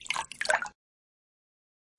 Two Drips 001
Drip, Dripping, Game, Lake, Movie, River, Run, Running, Sea, Slap, Splash, Water, Wet, aqua, aquatic, bloop, blop, crash, marine, pour, pouring, wave